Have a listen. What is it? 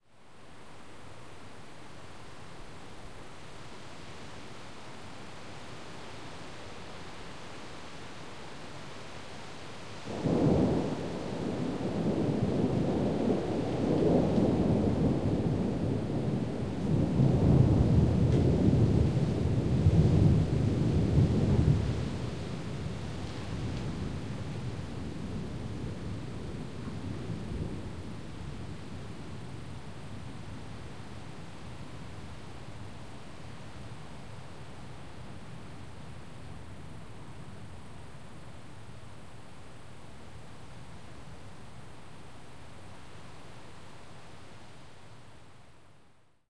The sound of a distant thunderstorm. Please write in the comments where you used this sound. Thanks!
clouds, electricity, field-recording, lightning, nature, noise, phenomena, rain, rumble, sparks, storm, Thunder, thunderstorm, weather